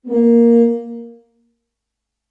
tuba note10
video, game, games, sounds